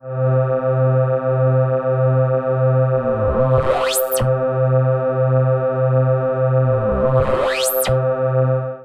Laser sound. Made on an Alesis Micron.
alesis, hum, laser, micron, synthesizer, zaps